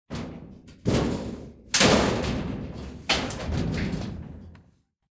long-metal-hit-01
Metal hits, rumbles, scrapes. Original sound was a shed door. Cut up and edited sound 264889 by EpicWizard.
bell, blacksmith, clang, factory, hammer, hit, impact, industrial, industry, iron, lock, metal, metallic, nails, percussion, pipe, rod, rumble, scrape, shield, shiny, steel, ting